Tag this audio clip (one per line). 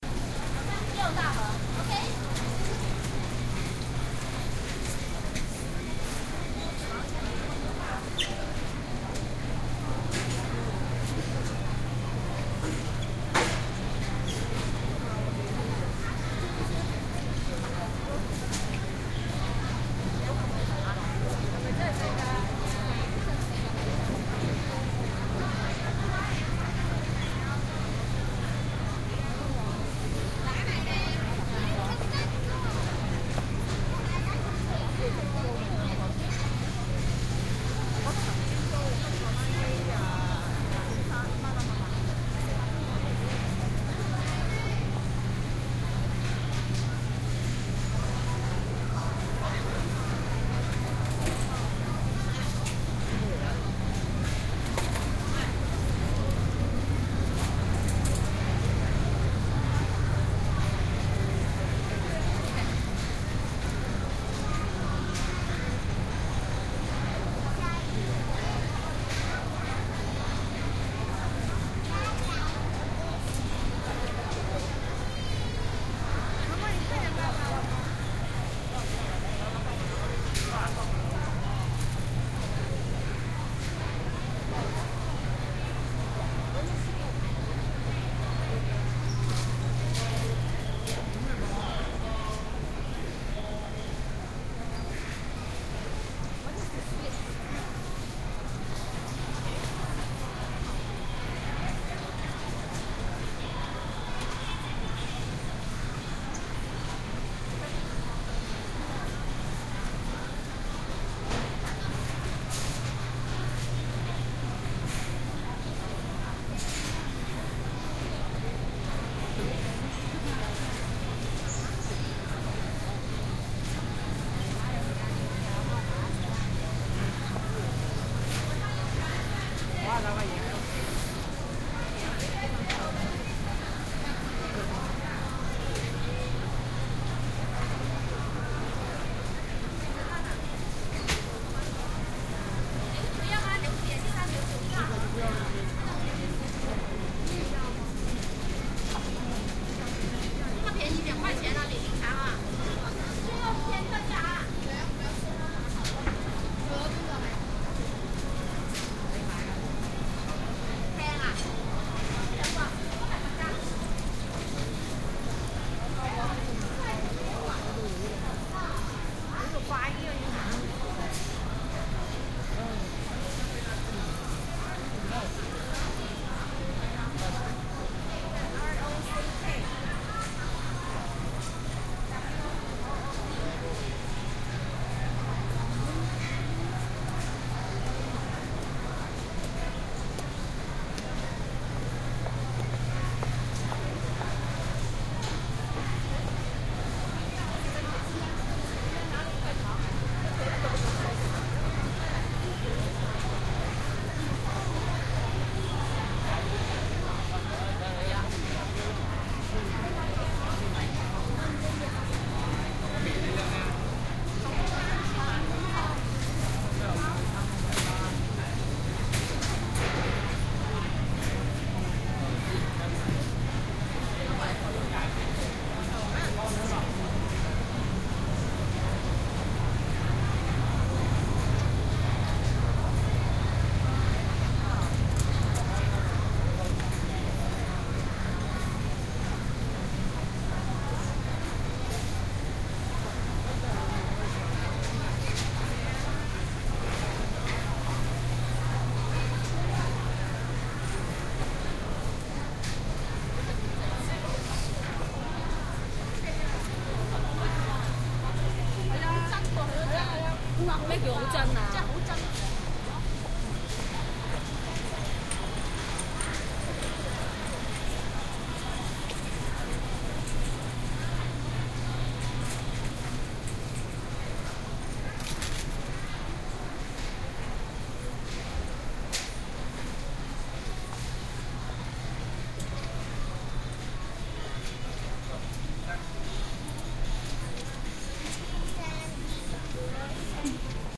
store
environmental-sounds-research
indoors
inside